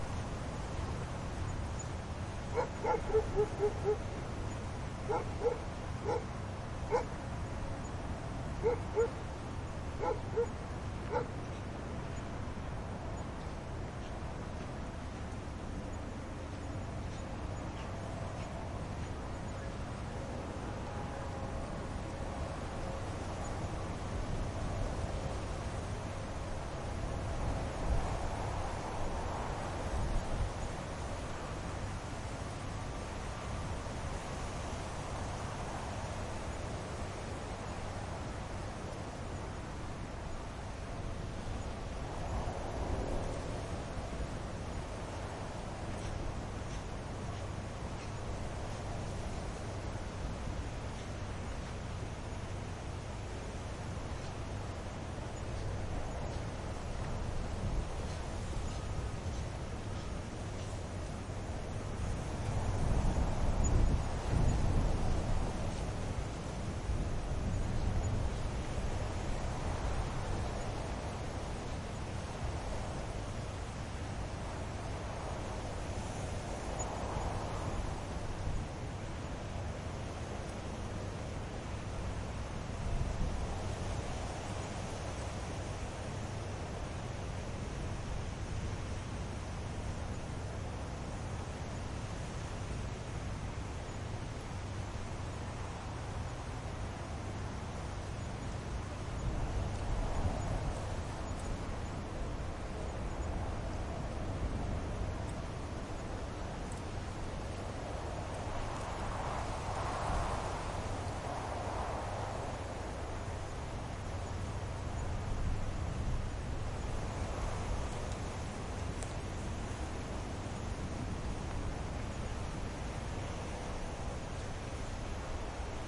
wind waves through leafy trees jewish cemetery +dogs barking bg echo eerie Casablanca, Morocco funky MS
barking, Morocco, trees, through, waves, leafy, cemetery, wind, Casablanca, dogs